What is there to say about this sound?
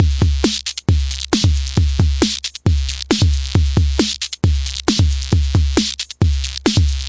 Beats recorded from my modified Roland TR-606 analog drummachine